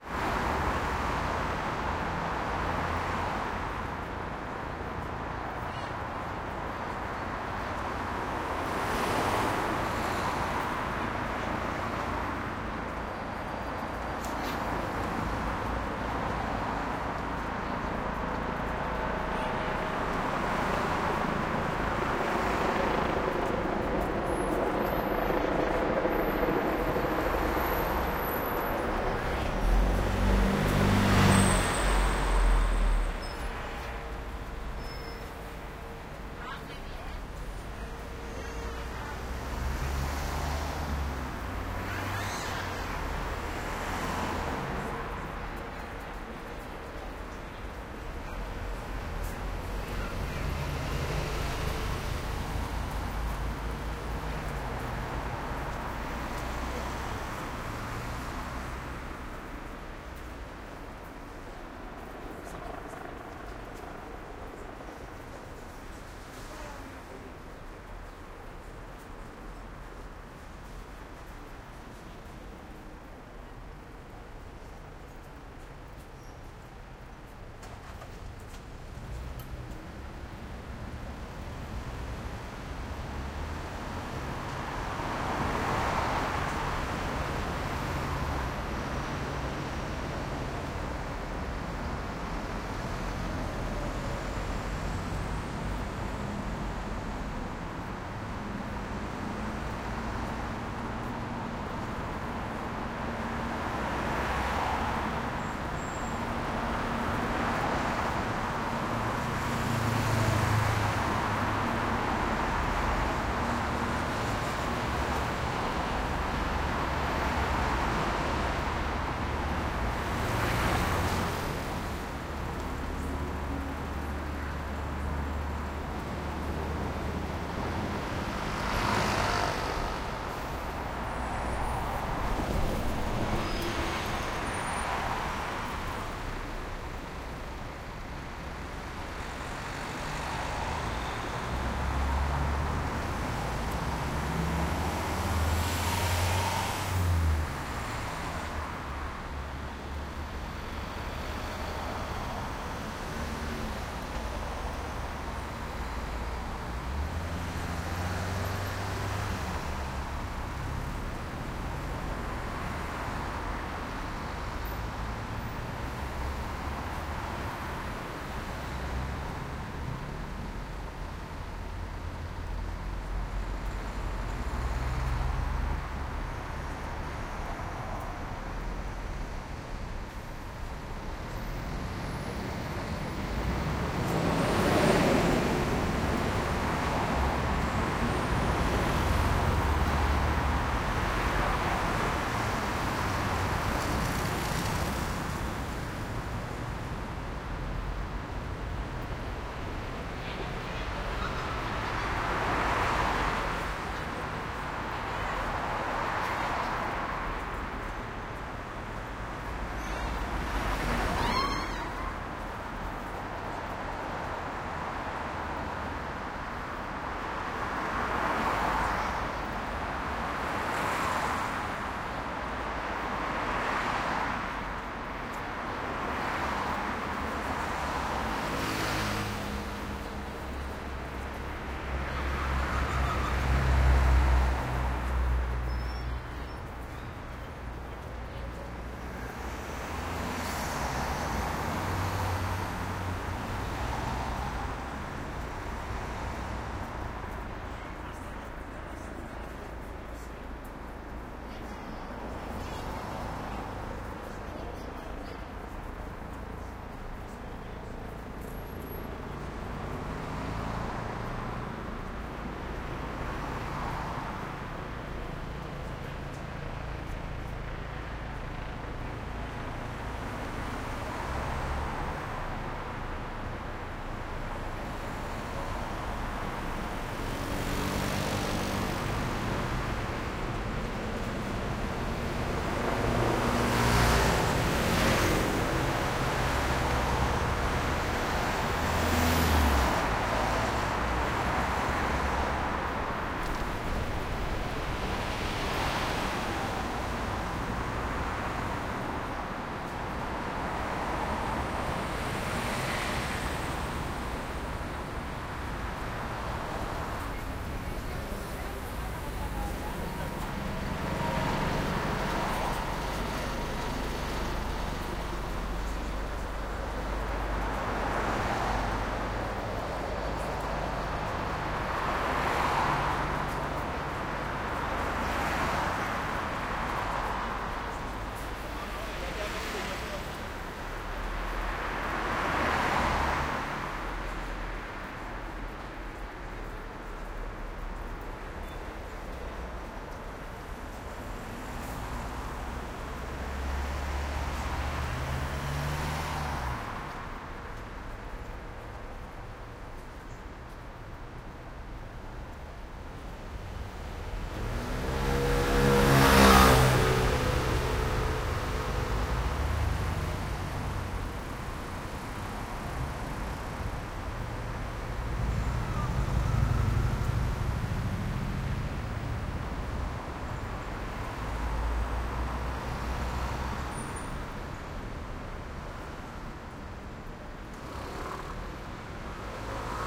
Madrid Cuesta San Vicente, close sound perspective. Medium traffic, close to the square, medium speed cars, siren, motorcycle, brakes, medium noise pedestrians.
Recorded with a Soundfield ST450 in a Sound Devices 744T